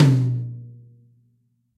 Toms and kicks recorded in stereo from a variety of kits.
drums, Acoustic, stereo